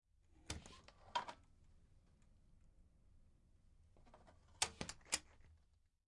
Door opens and closes 2
A door opens and closes. How exciting
closes, door, opens